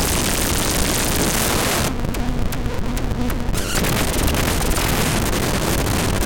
I had a goal for this pack. I wanted to be able to provide raw resources for anyone who may be interested in either making noise or incorporating noisier elements into music or sound design. A secondary goal was to provide shorter samples for use. My goal was to keep much of this under 30 seconds and I’ve stuck well to that in this pack.
For me noise is liberating. It can be anything. I hope you find a use for this and I hope you may dip your toes into the waters of dissonance, noise, and experimentalism.
-Hew